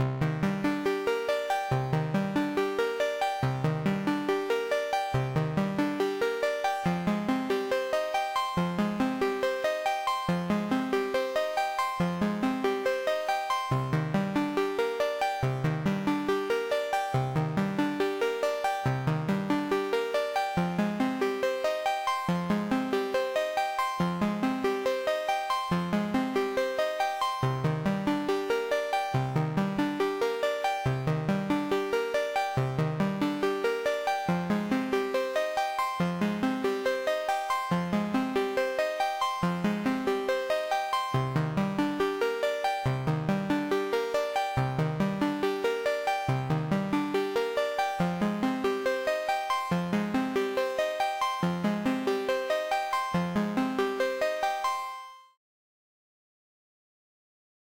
A little synthetizer harp modulating, ready for use in most genres, mostly electronica.